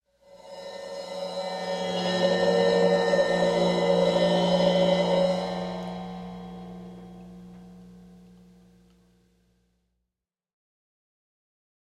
cYmbal Swells Royer
i was demoing the new Digital Performer 10.1 with a Royer R-10 pair in Blumlein array but wanted to check out one of the ribbons because it possibly sounded blown so i went ahead not bothering to create a new mono file. the mic was tracked through a Yamaha mixer into Digital Performer via a MOTU 624. i have various cymbals including a Paiste hi-hat and a Zildjian ride which i bowed or scraped. there is an occasional tiny bit of noise from the hard drive, sorry. it has the hiccups.
some of these have an effect or two like a flange on one or more and a bit of delay but mostly you just hear the marvelous and VERY INTERESTING cymbals!
all in my apartment in NYC.
Royer, cymbal-swoosh, ribbon-mic